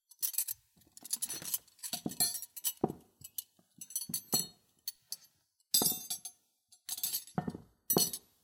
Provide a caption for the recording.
Moving cutlery.
{"fr":"Couverts 6","desc":"Bouger des couverts.","tags":"assiette couvert cuisine fourchette couteau cuillère"}